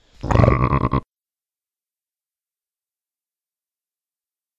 A comical sound combining a "snarf" with some sort of low-pitched bleating sound. The end effect is quite... comical.
beast, biting, comedy, creature, snarf, snorting, vocal